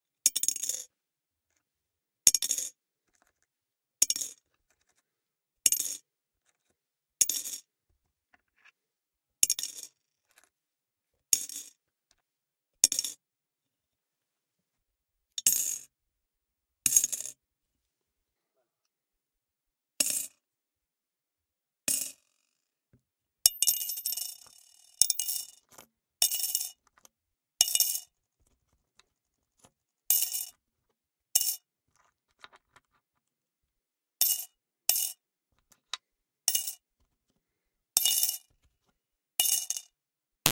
hucha cerdo ceramica monedas- ceramic piggy bank
hucha cerdo ceramica - ceramic piggy bank
cerdo, ceramic, hucha, bank, ceramica, piggy, coins